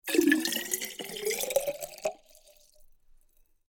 Water being poured into a metalic drink bottle until it is almost full.